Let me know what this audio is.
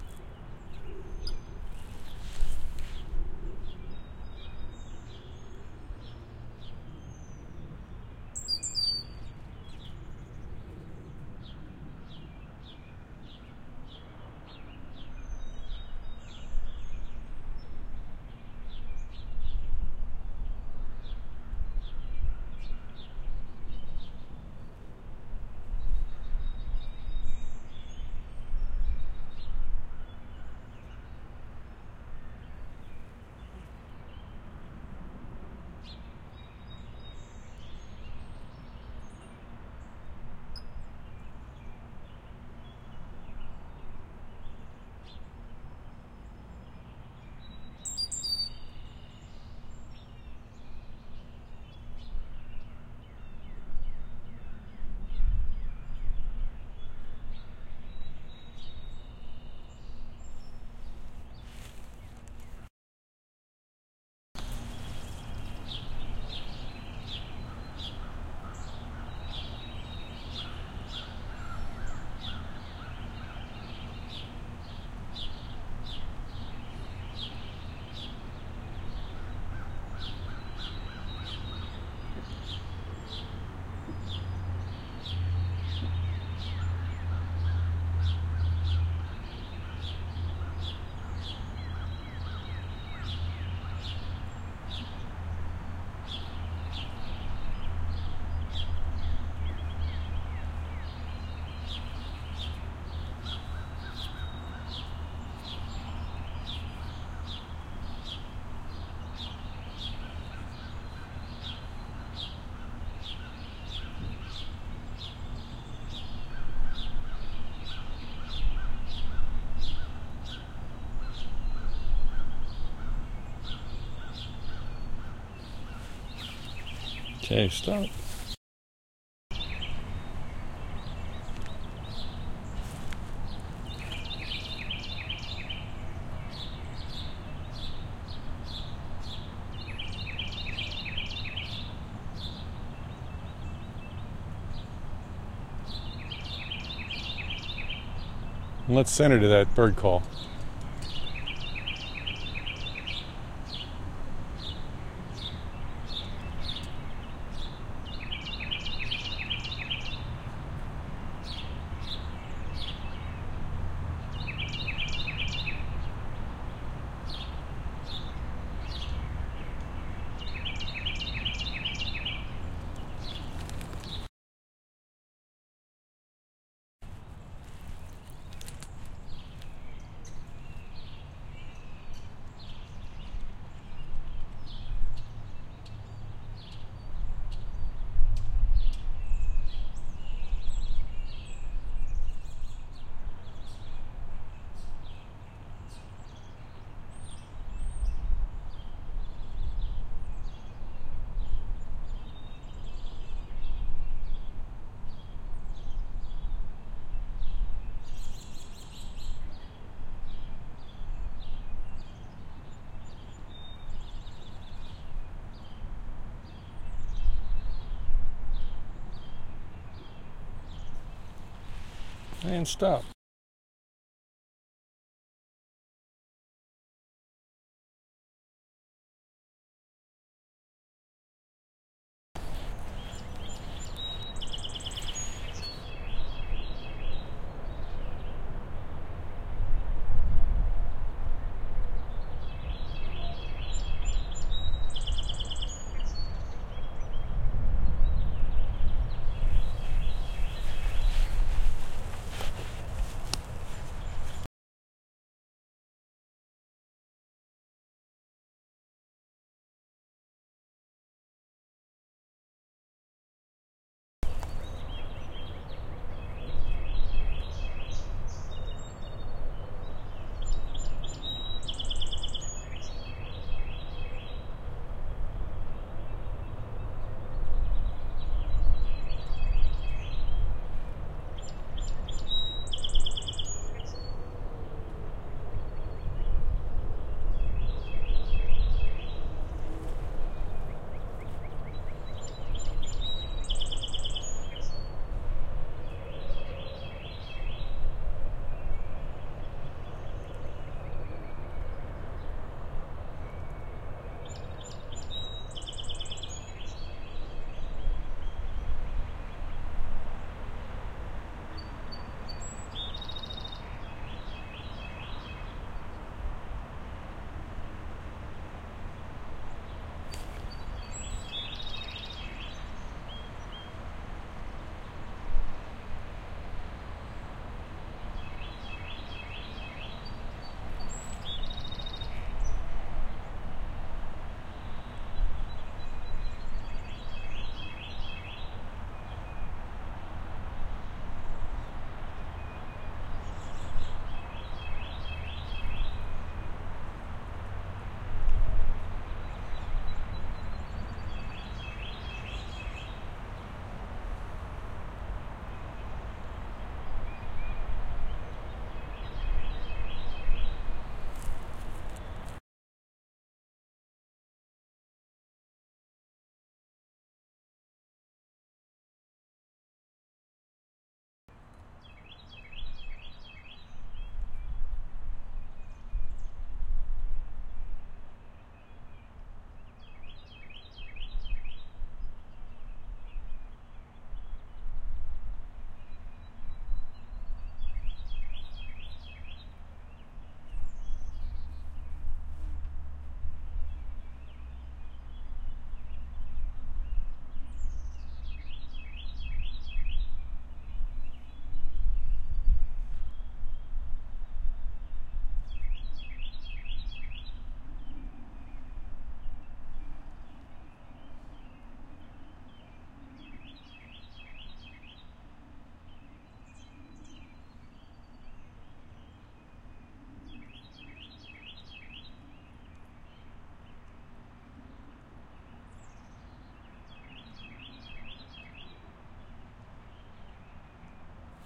Quiet neighborhood stereo ambi with occasional bird chirp and several wind ruffles.